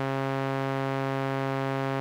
C-1 recorded with a Korg Monotron for a unique synth sound.
Recorded through a Yamaha MG124cx to an Mbox.
Ableton Live
korg, Monotron, Sample, sampler, sfx, sound, synth